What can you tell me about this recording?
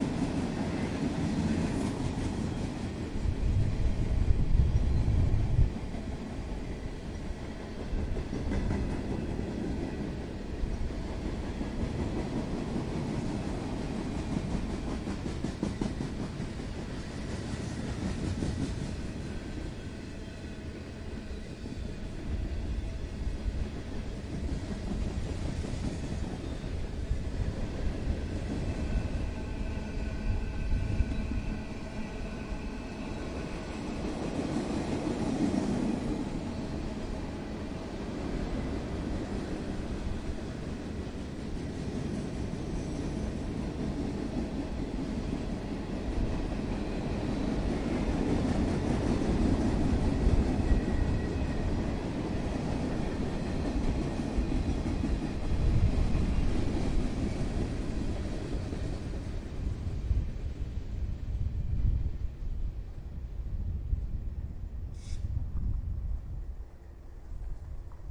Live recording of a train passing by on tracks, engine has already gone, this is the sound of the rail cars rolling along.